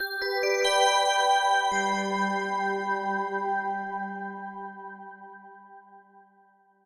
Mission Successful
positive, awesome, success, win, successful